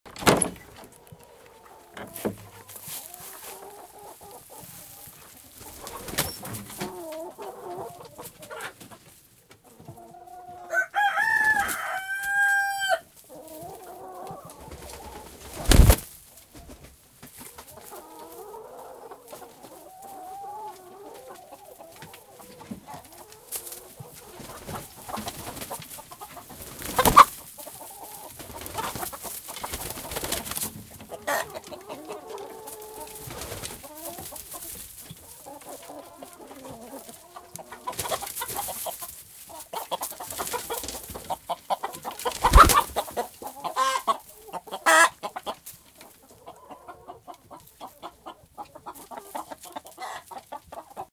Chickens in the coop, morning
Entering a chicken coop at 6:30 in the morning. The chickens are just waking up and getting ready to be let outside. They cluck and coo and flutter around. A cockerel crows. The recording begins with the sound of the door opening and footsteps in the straw.